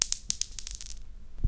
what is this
dice roll03
Dice rolled on stone floor - longer sounding throw. Recording hardware: LG laptop running Audacity software + Edirol FA66 Firewire interface